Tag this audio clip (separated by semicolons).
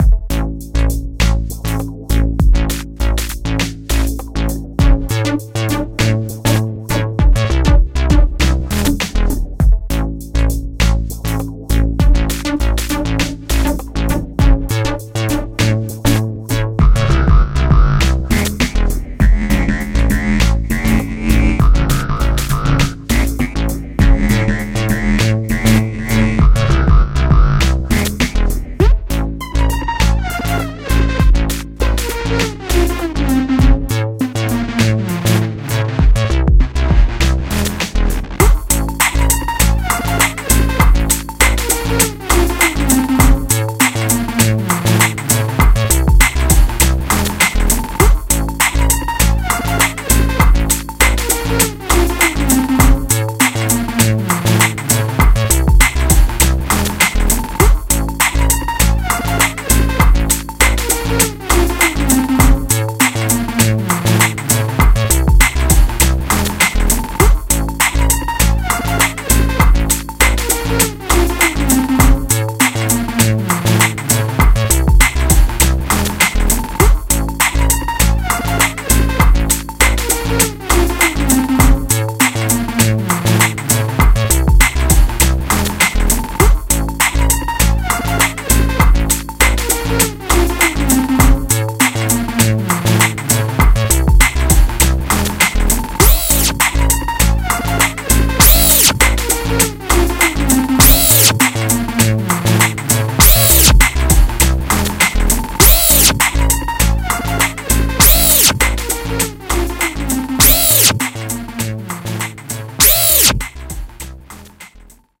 bass; beat; dance; drum; electro; hard; loop; techno; trance